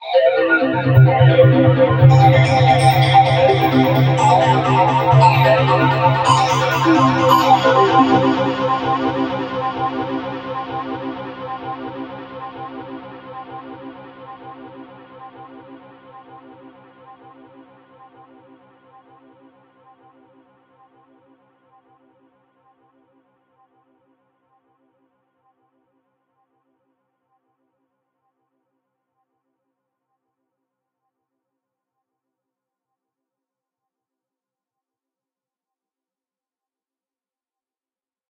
A short drone from a synthesizer.
Drone, Noise, Electronic